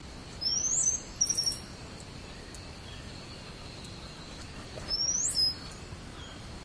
bird singing
birds singing & chirping
bird, chirp, chirping, singing